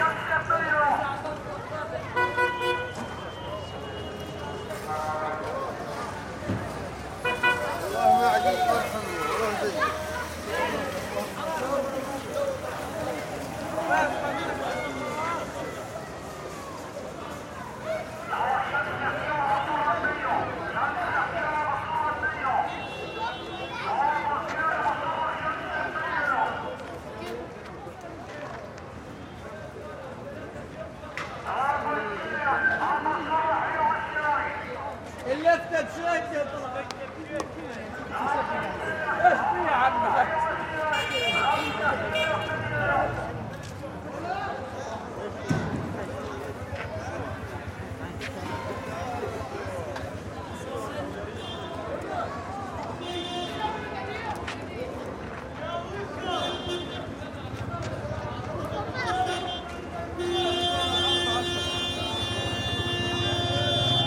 street vendor selling oranges shouting int mic PA like prison guard distant echo +distant auto horn honk long annoyed end Gaza 2016
vendor, shout, Palestine, arabic, PA, street